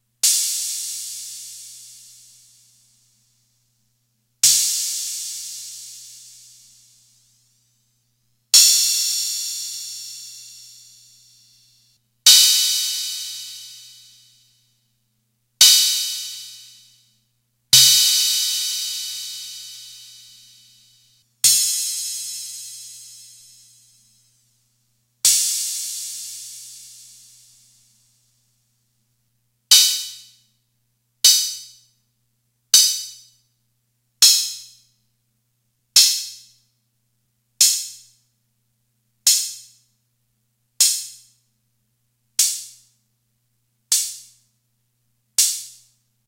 1983 Atlantex MPC analog Drum Machine cymbal sounds
drum, analog, mpc, cymbal, 1983